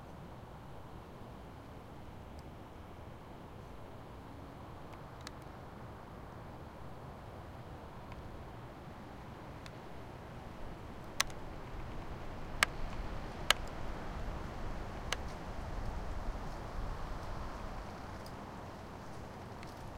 tree creak 03
wind and creaks from several trees, one close and several in the distance.
creak, nature, tree, wind, wood